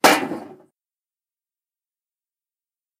Putting a cup on a steel table next to an iPod 5 microphone. Location: The Castle, Neutral Bay, close to the wharf, Sydney, Australia, 15/04/2017, 16:56 - 21:11.